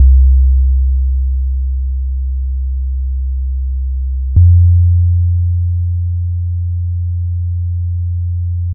Part of the Epsilon loopset, a set of complementary synth loops. It is in the key of C major, following the chord progression Cmaj7 Fmaj7. It is four bars long at 110bpm. It is normalized.